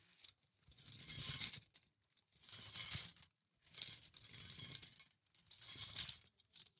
Moving cutlery.
{"fr":"Couverts 4","desc":"Bouger des couverts.","tags":"assiette couvert cuisine fourchette couteau cuillère"}
spoon, fork, knife, steel, metal, rummaging, kitchen, cutlery